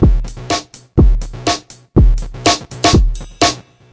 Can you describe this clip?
This is a glitchy rhythmic loop I made in Reason a few months ago. There are a few electronic noises in there mixed with more traditional drum samples. 122 bpm.
rhythm, electronic, loop, glitch, drum, loopable, noise, 122bpm, rhythmic